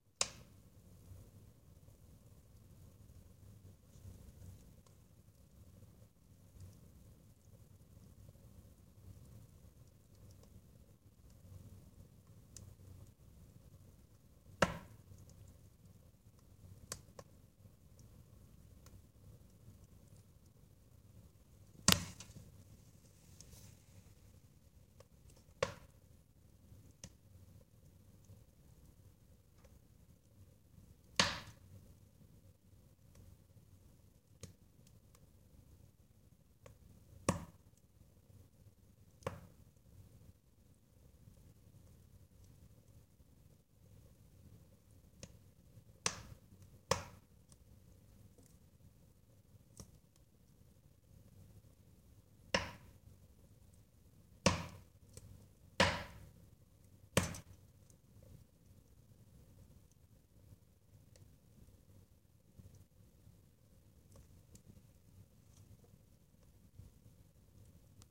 The Fireplace 2
burn, burning, chimney, crackle, crackling, fire, fireplace, flame, flames, spark, sparks, wood